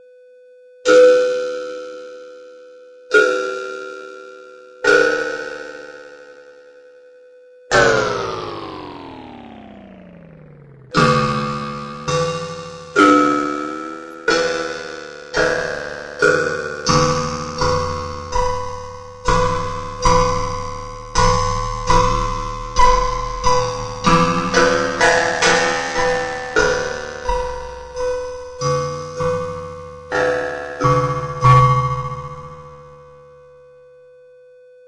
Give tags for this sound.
alien
electric
laboratory
modulation
experiment
computer
signal
digital

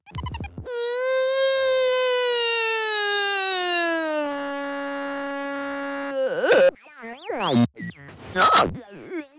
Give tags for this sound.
circuit-bent
electronic
freakenfurby
furby
glitch
toy

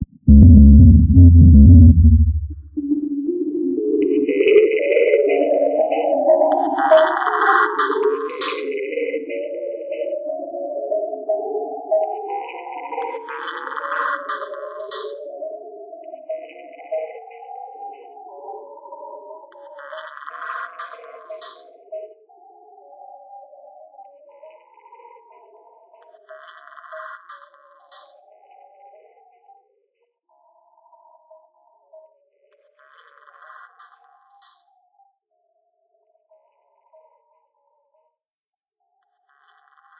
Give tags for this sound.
bpm
rhytmic

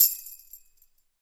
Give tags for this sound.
chime
chimes
orchestral
percussion
rhythm
Tambourine

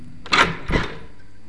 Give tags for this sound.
door
open
opening

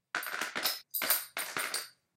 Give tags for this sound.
many
transition
fall
chips

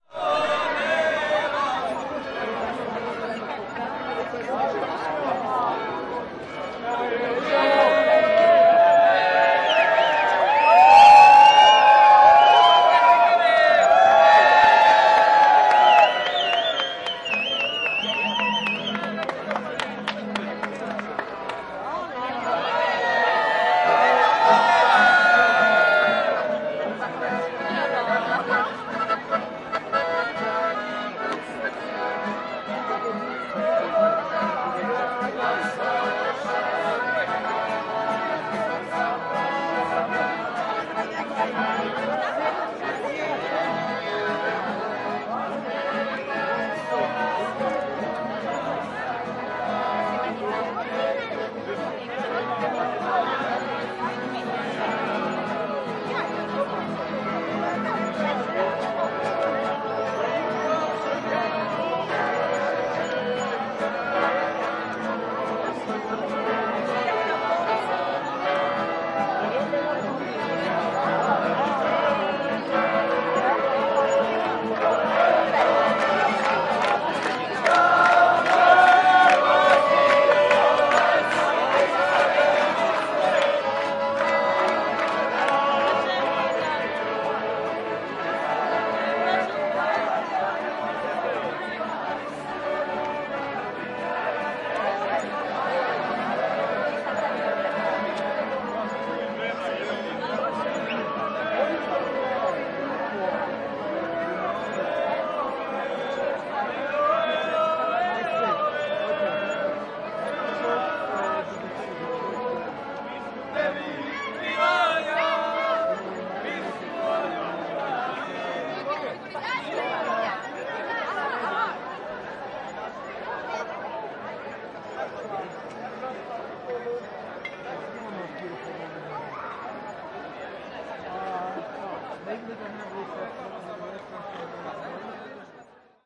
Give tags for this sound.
crkva-sv-Vlaha
Croatia
crowd
Dubrovnik
fieldrecording
hubbub
music
people
procession
sing
singing
song
square
tourists
voices
wedding

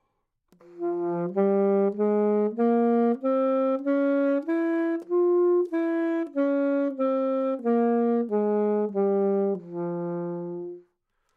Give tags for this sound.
scale
neumann-U87
alto
good-sounds
Fminor
sax

